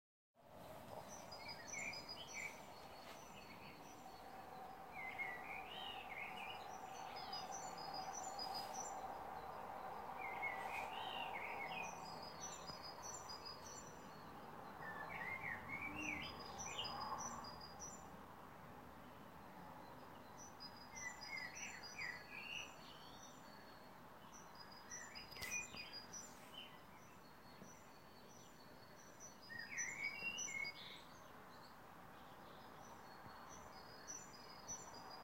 Nature Birdsong
forest spring birdsong bird
spring, bird, birdsong, nature, forest, birds